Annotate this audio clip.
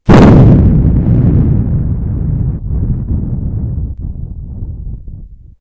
Quite realistic thunder sounds. I've recorded this by blowing into the microphone.
Lightning, Storm, Thunder, Thunderstorm, Weather